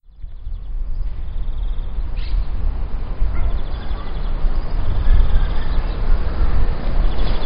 en aquesta grabació trobem uns ocells cantant a dalt d'un pi